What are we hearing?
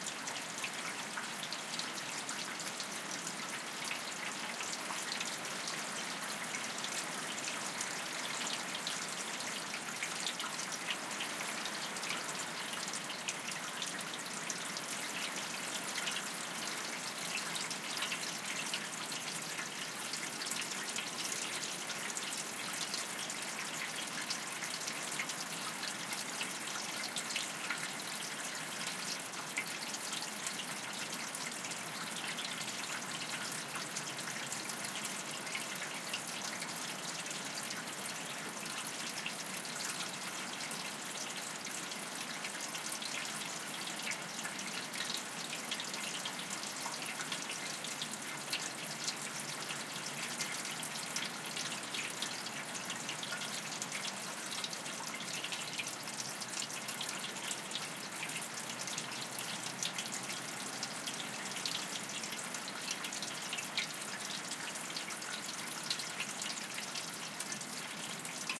Water trickling in a metal down pipe attached to a gutter in the rain. Recording chain: Rode NT4 (in Rode Blimp) - Edirol R44 (digital recorder).